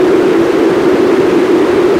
Analogue white noise BP filtered, center around 360Hz
Doepfer A-118 White Noise through an A-108 VCF8 using the band-pass out.
Audio level: 4.5
Emphasis/Resonance: 9
Frequency: around 360Hz
Recorded using a RME Babyface and Cubase 6.5.
I tried to cut seemless loops.
It's always nice to hear what projects you use these sounds for.